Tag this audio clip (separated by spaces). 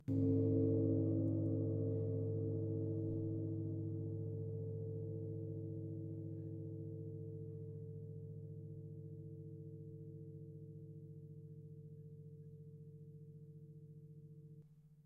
Sample Soft